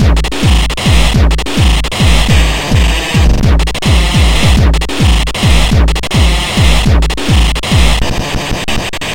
210bpm glitch
an almost melodic glitchcore loop made with dblue-glitch, madtracker and hammerhead
210-bpm, breakbeat, glitchcore, core, drum, lo-fi, hardcore, breakcore, glitch, loop